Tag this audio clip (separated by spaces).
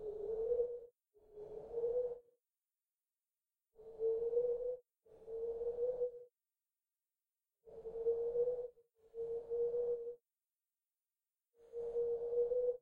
Bird Animal Jungle Creature